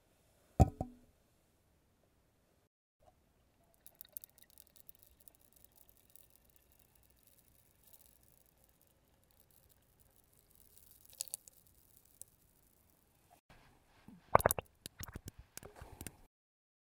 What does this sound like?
intento de aceite
close-up, oil